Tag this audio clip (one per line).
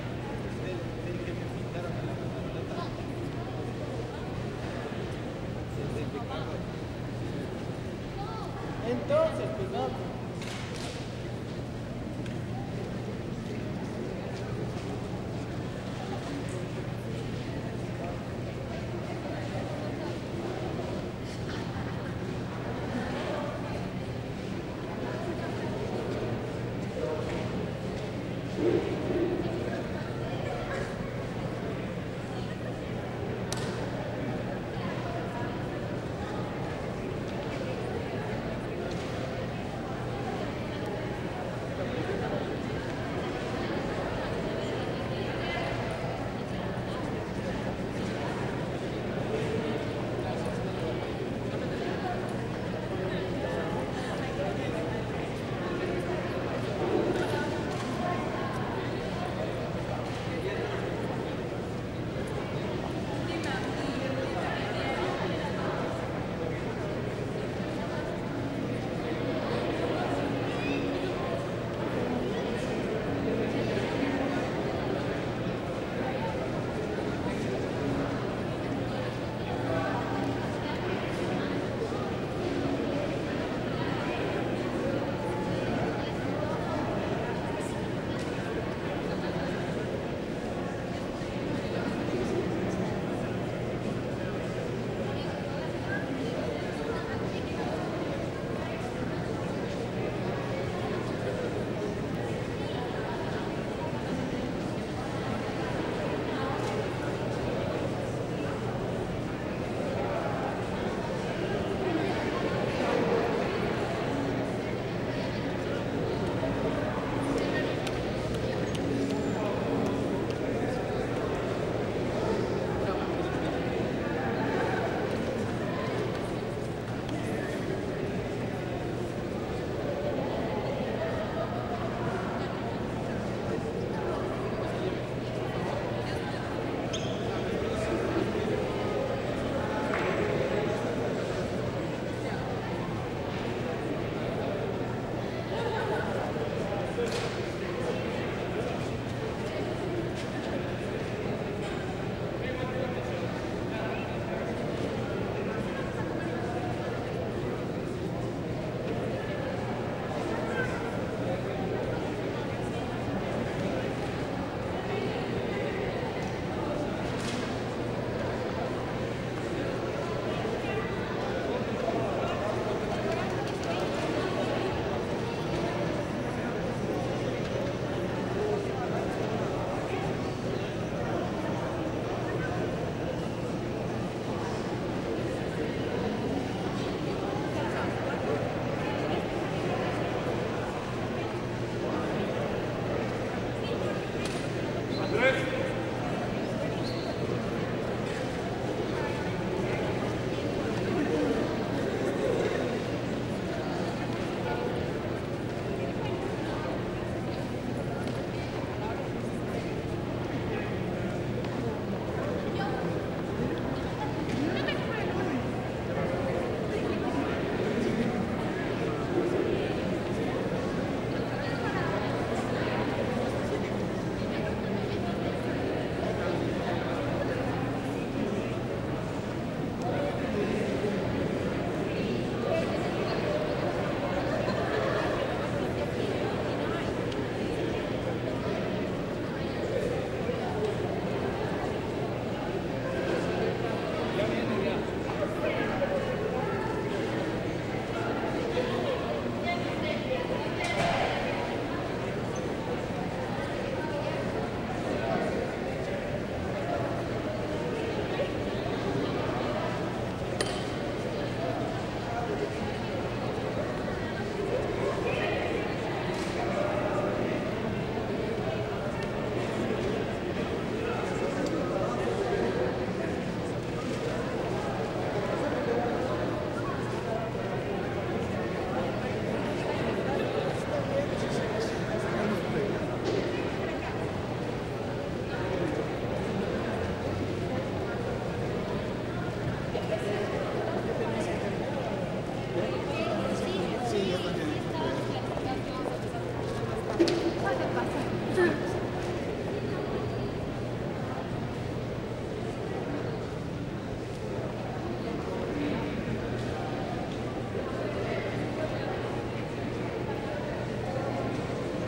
Ambiente
culturas
de
las
patio
Universidad